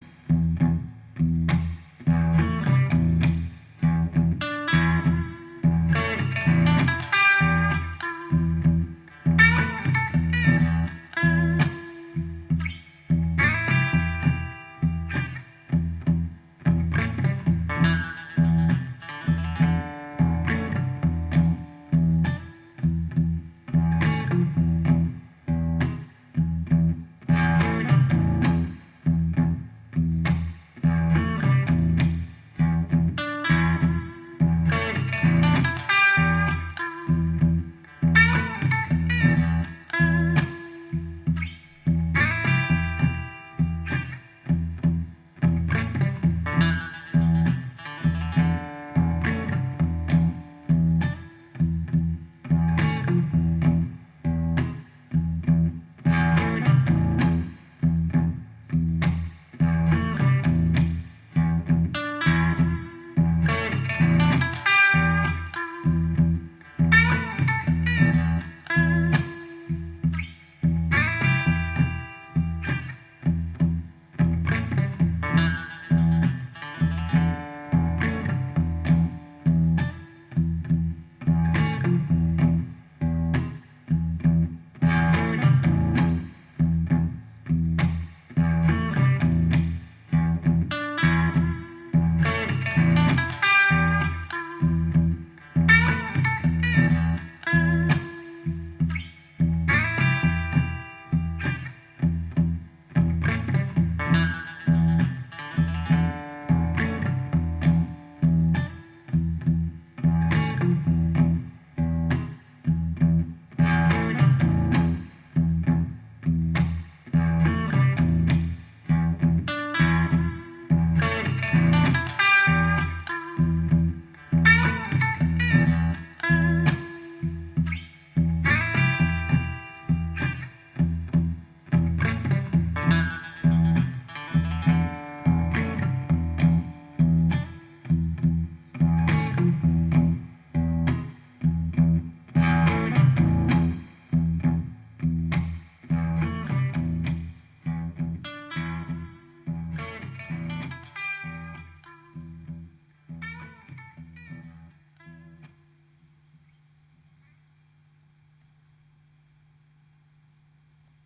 Blues Loop
A basic hard hitting loop.